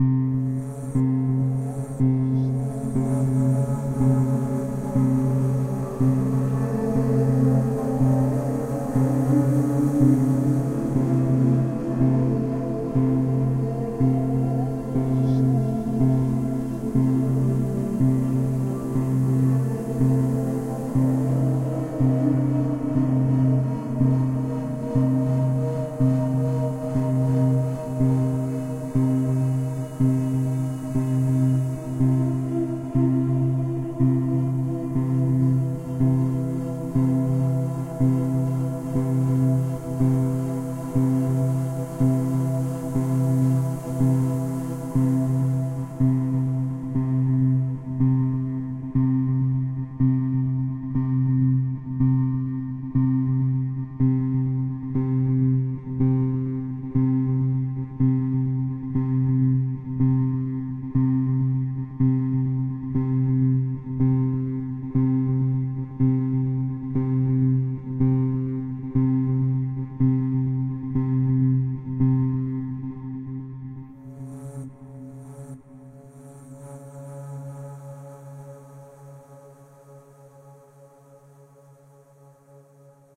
A mixture of a repeated guitar note at 120bpm, choirs and synthesised sounds - one of many I have made for use as intros/backgrounds to give an unearthly feel. Part of my Atmospheres and Soundscapes pack which consists of sounds designed for use in music projects or as backgrounds intros and soundscapes for film and games.